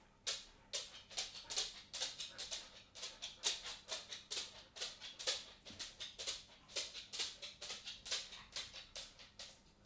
Dog walks on wooden floor